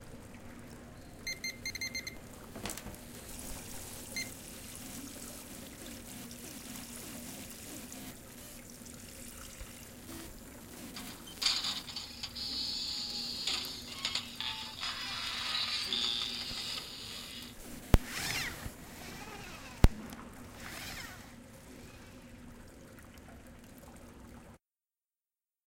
Caçadors de sons - Estranya revolució
Soundtrack by students from Joan d'Àustria school for the workshop “Caçadors de sons” at the Joan Miró Foundation in Barcelona.
Composició del alumnes de 3er de l'ESO del Institut Joan d'Àustria, per el taller ‘Caçadors de sons’ a la Fundació Joan Miró de Barcelona.